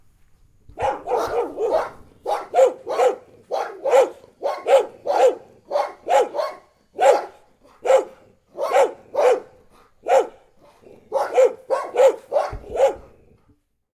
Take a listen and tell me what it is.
Angry Dogs Barking
While I was on vacation, this two fellas just loved to bark almost all night long. Yeah, I know, some rest I got... :(
They got pretty upset as you can see. There's some low frequency rumble at the beginning probably due to bad handling, sorry. Should be easily solved with a lo-cut, though.
dog, big, angry, chien, barking, ladrando, perros, growling, woof, sized, pet, bark, medium, canine, dogs